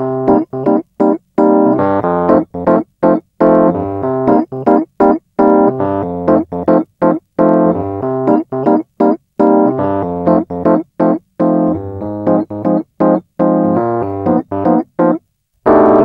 3er Ding 0
Funky Loop played with Rhodes MK 1